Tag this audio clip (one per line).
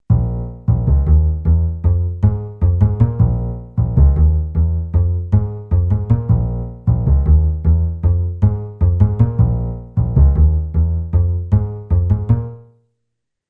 bassloop
crime
bass
acoustic-bass